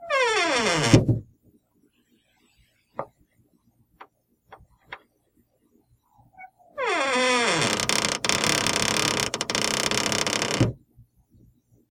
Door-Wooden-Squeak-0008
This is the sound of a common household door squeaking as it is being opened or closed.
This file has been normalized and most of the background noise removed. No other processing has been done.
Wooden, Creak, Squeak